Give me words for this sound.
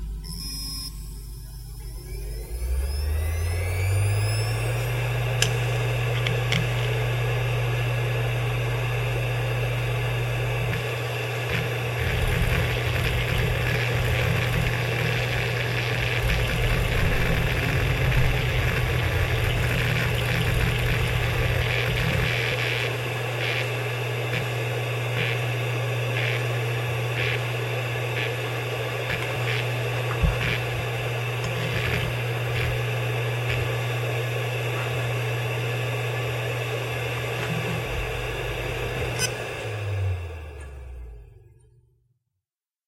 Seagate Barracuda 7200.7 - Slow Spinup - FDB
A Seagate hard drive manufactured in 2005 close up; spin up, writing, spin down.
This drive has 2 platters.
(ST3160023A)